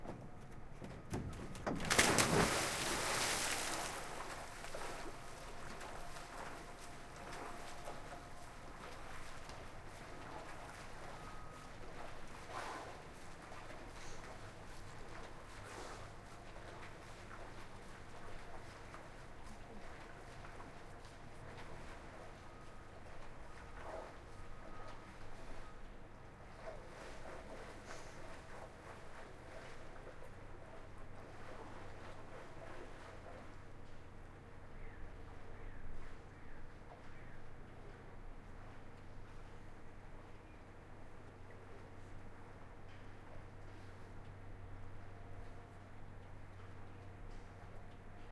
quarry sabe splav diving swimming
this is 300 meters near to the quarry by the riverside, someone dives from a splav (little chalet floating on the river) and swim in the river sabac. You can still hear the beep of the truck on the quarry site, but it is really distant. It' s interesting to listen to the 5 other members of the pack. They're all confined in the same geottaged area, the quarry on river Sabac near Belgrade Serbia. Recorded with Schoeps M/S mikes during the shooting of Nicolas Wagnières's movie "Tranzit". Converted to L/R
sabac, river, industry, nature, field-recording, belgrade